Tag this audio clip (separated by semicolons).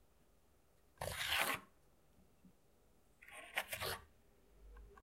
look; metal; occulus; door; close